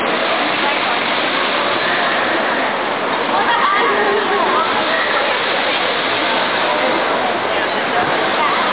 some california mall
An old recording when I went to California. Some mall in Anaheim. From a video taken with a Nikon Coolpix. (old = sucky)